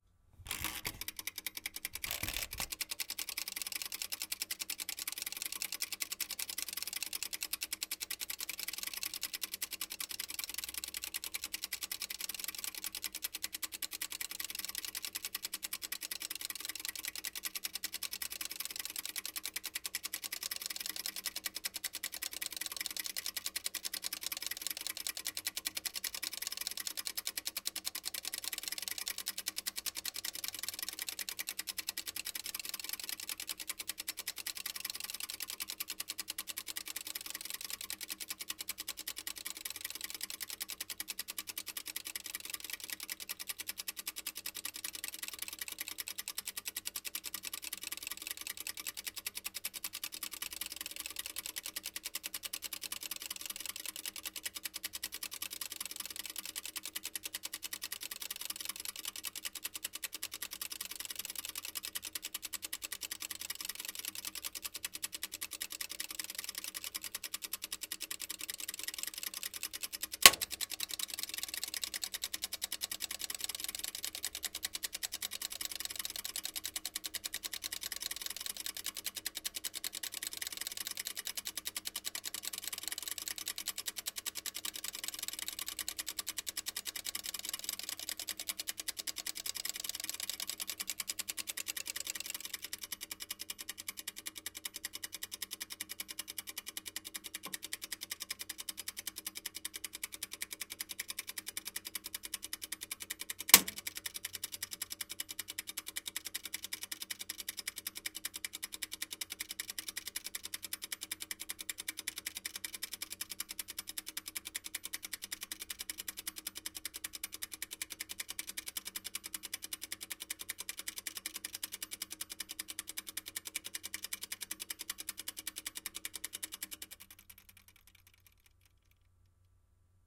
washingMachineCoupleTimers stereo
Old soviet washing machine "Сибирь-2" ("Siberia-2") two timers. Start first timer, start second timer, ticks, stop second timer, stop first timer. Loud clicks it is timer tripped.
USSR, soviet, timer, washing-machine, laundry, washing, mechanical-timer